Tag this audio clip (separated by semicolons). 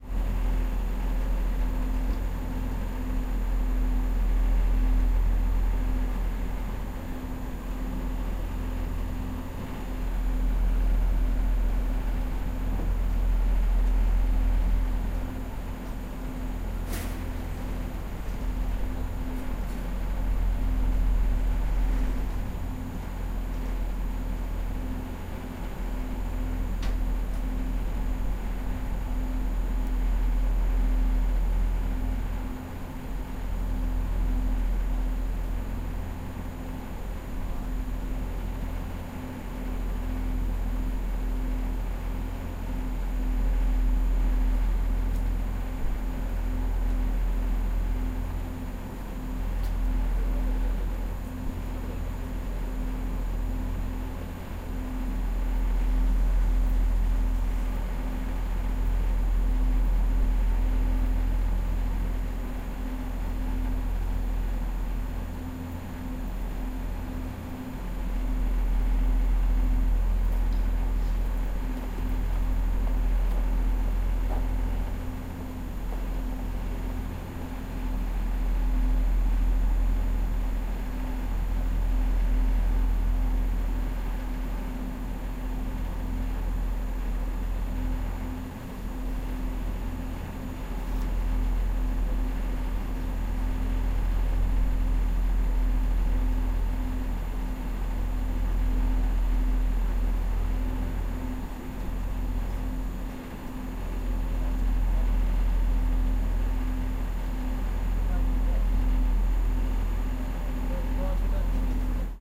seoul; korea